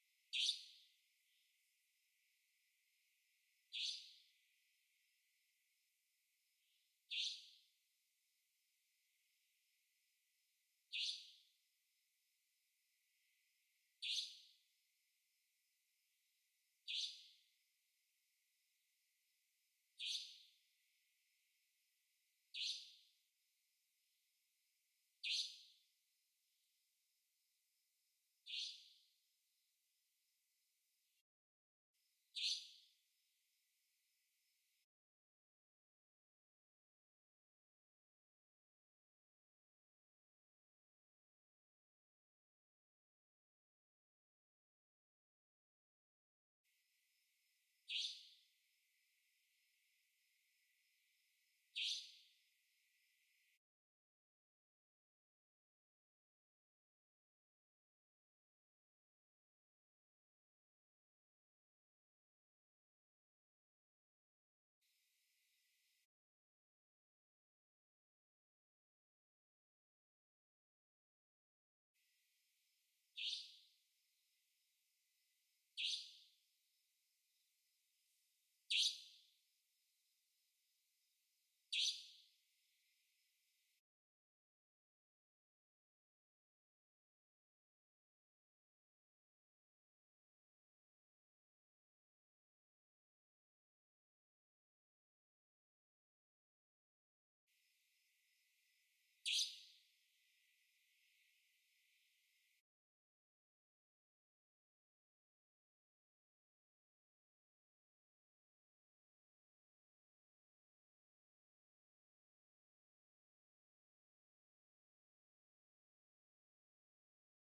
Recording with my Zoom H4next.
There's a nightngale circled over my house in Nantou.
It's looking for food I guess.